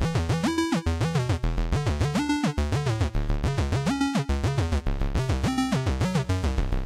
8-bit game music
8-bit, awesome, chords, digital, drum, drums, game, hit, loop, loops, melody, music, sample, samples, sounds, synth, synthesizer, video